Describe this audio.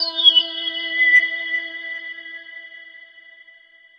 THE REAL VIRUS 10 - RESONANCE - E4
High resonances with some nice extra frequencies appearing in the higher registers. All done on my Virus TI. Sequencing done within Cubase 5, audio editing within Wavelab 6.